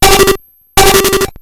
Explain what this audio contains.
These are TR 505 one shots on a Bent 505, some are 1 bar Patterns and so forth! good for a Battery Kit.
a, bent, distorted, circuit, oneshot, hits, 505, than, hammertone, drums, beatz, higher, glitch